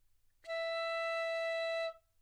Part of the Good-sounds dataset of monophonic instrumental sounds.
instrument::piccolo
note::E
octave::5
midi note::64
good-sounds-id::8271